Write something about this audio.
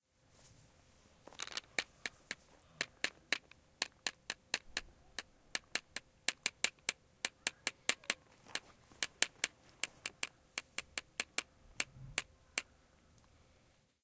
soundscape-IDES-kamar, lucas et morgane conte de la grotte-cuisine
This is one of three compostions made by the pupils of SP3, IDES, Paris, using the sounds uploaded by our partner school in Ghent, Belgium.